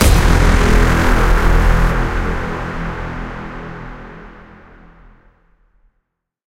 Epic Horn Hit 01
Fx, Horns, Horn, Cinematic, Epic, Hit, Sound